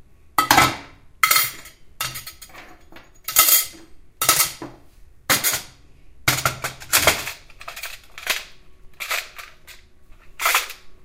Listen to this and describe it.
Binaural stereo recording of an angry clatter of dishes, silverware, and cups.
dish, cutlery, angry